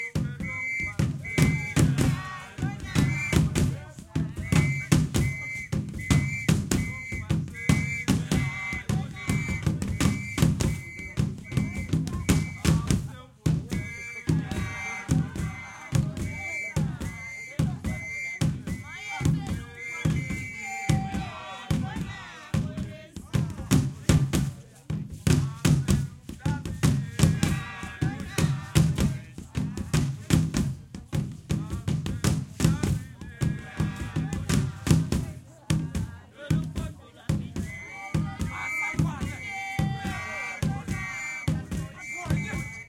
Little snippet of a dance performed for tourists in Swaziland. Annoying whistle, but there's a small portion further in where it shuts up for a bit.